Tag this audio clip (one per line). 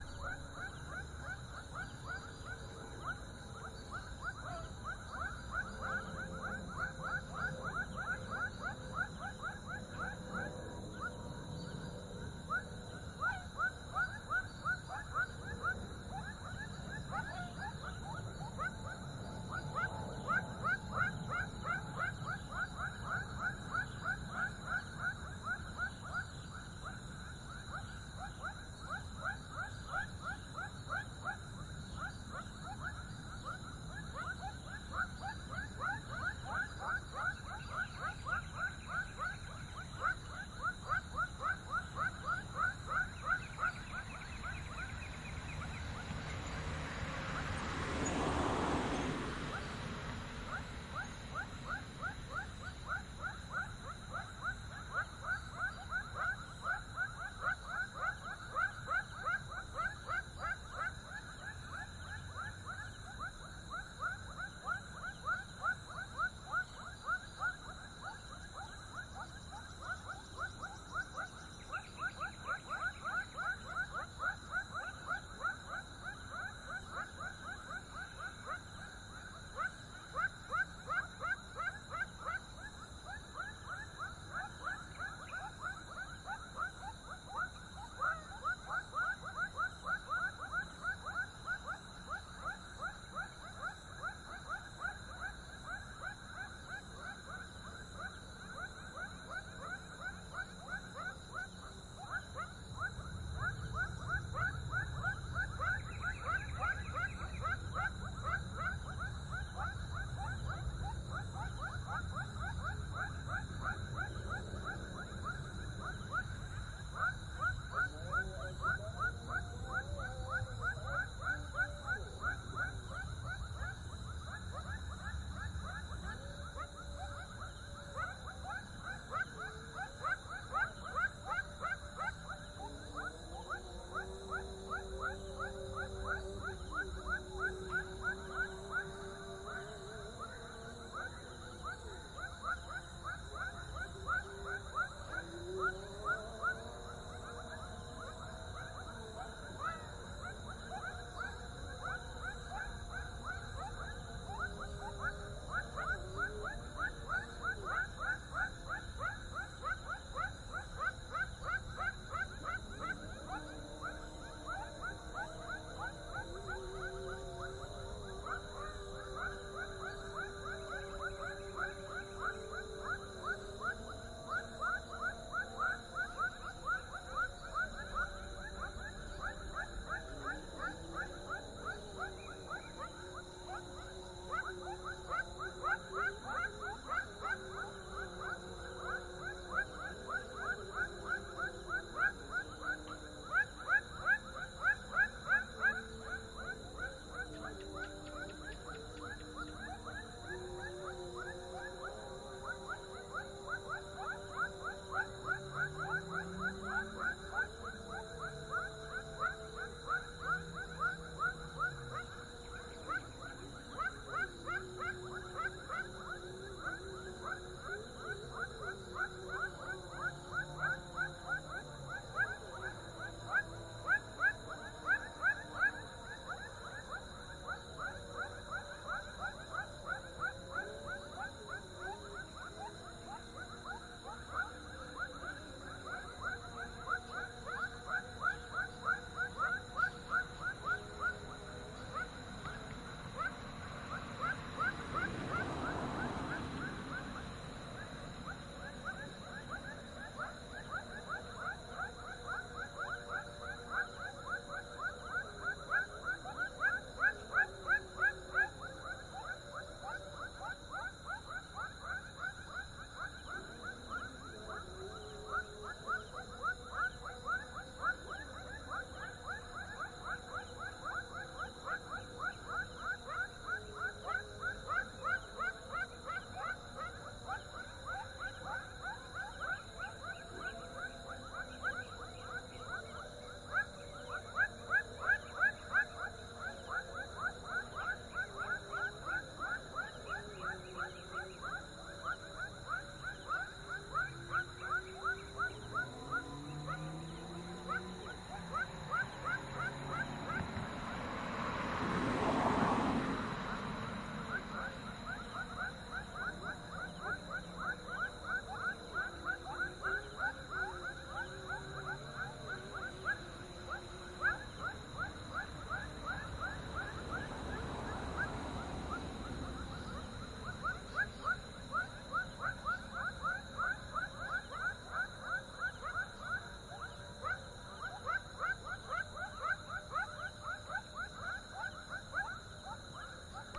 prayer
car
weather
tropical-country
light-traffic
ambience
airplane
birds